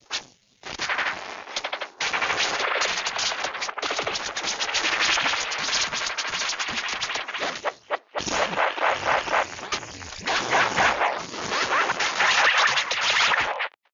big bug bent